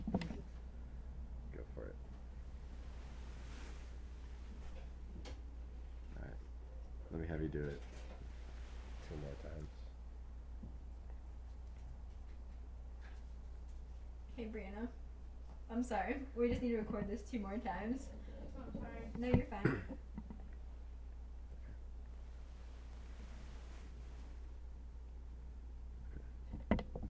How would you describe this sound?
Roll Over in Bed Sequence
Turning over in bed.